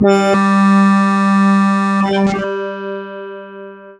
PPG 015 Organwave G#3
This sample is part of the "PPG
MULTISAMPLE 015 Organwave" sample pack. The sound is based on an organ
sound, but there are very strange attack and release artifacts. And
these make the sound quite experimental. In the sample pack there are
16 samples evenly spread across 5 octaves (C1 till C6). The note in the
sample name (C, E or G#) does not indicate the pitch of the sound but
the key on my keyboard. The sound was created on the Waldorf PPG VSTi. After that normalising and fades where applied within Cubase SX & Wavelab.
ppg, multisample